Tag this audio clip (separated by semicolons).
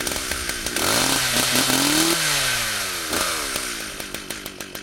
sawing; cutting; chainsaw; saw